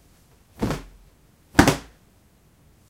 Shaking out some clothes --> hard sound